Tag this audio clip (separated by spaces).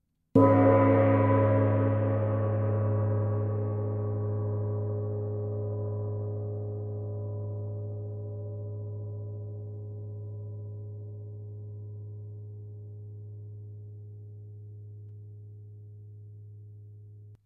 Loud Ambient Processed Medium Sample Gong-strike